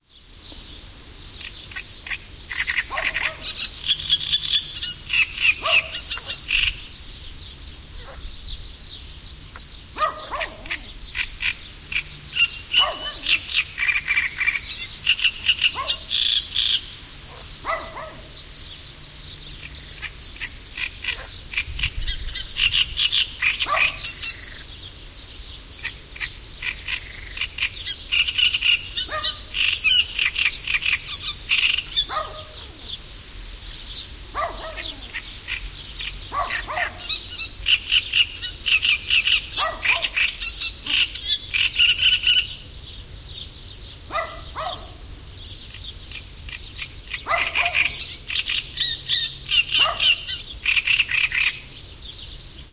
dog frog
the sound of a far country farm whit a dog and birds and frog recorded with Marantz PMD660 and internal microphone
dog, birds